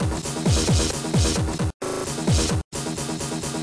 3rd bar from the CD skipping glitchcore sequence less synth. Loopable and very fxxckable. percussive with some tonality.
experimental, glitch, hard, loop, skipping-cd, techno, weird